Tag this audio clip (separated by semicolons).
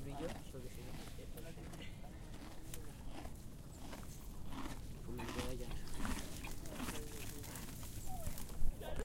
eating; forest; hourse; Deltasona; nature